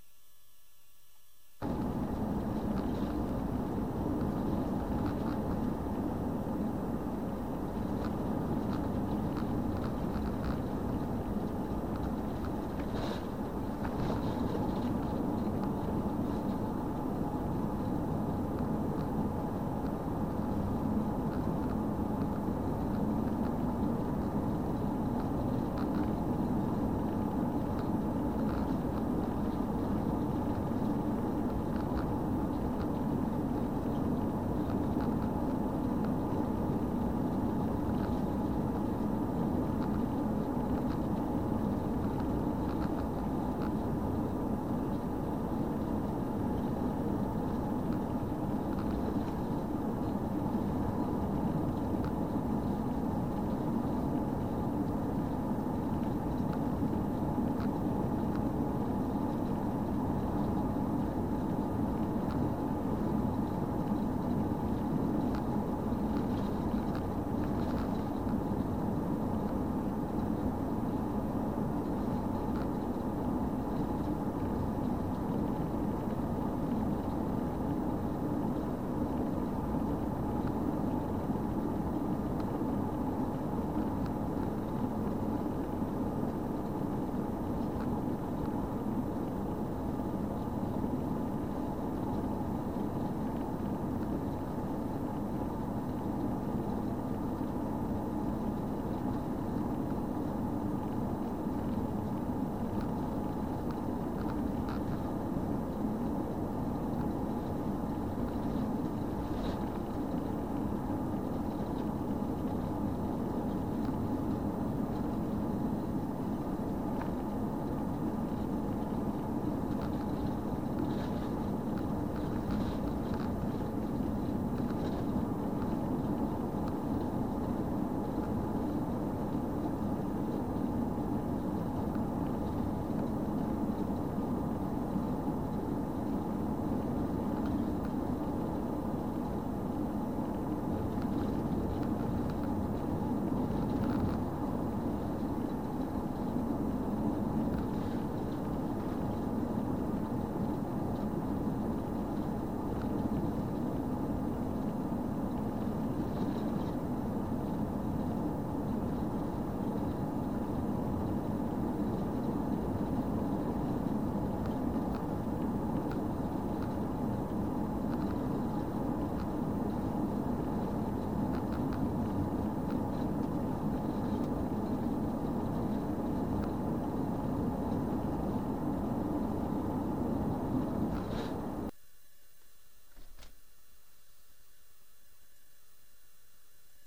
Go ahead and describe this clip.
Road noise New Zealand Fiat ducato campervan
The road noise from a Fiat Ducato Campervan on state highway 94 near Mount Hamilton.
New Zealands roads are rather gnarly with the gravel protruding from the tar.Hence the high noise.